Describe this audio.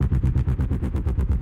my own bass samples.